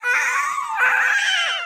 A soul wrecking high pitched voice sound effect useful for visages, such as banshees and ghosts, or dinosaurs to make your game truly terrifying. This sound is useful if you want to make your audience unable to sleep for several days.
gamedev, indiedev, monster, indiegamedev, game, Speak, gamedeveloping, sfx, voice, witch, Talk, vocal, screech, videogames, scream, Dinosaur, Voices, RPG, videogame, arcade, ghost, high-pitch, games, gaming, fantasy, banchee